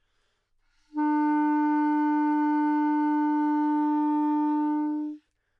Part of the Good-sounds dataset of monophonic instrumental sounds.
instrument::clarinet
note::D
octave::4
midi note::50
good-sounds-id::3291
clarinet, D4, good-sounds, multisample, neumann-U87, single-note